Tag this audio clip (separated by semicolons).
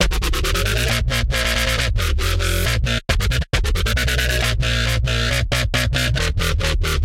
music; melody; 8-bit; video; samples; hit; synth; synthesizer; chords; drums; sounds; game; drum; loop; awesome; sample; loops; digital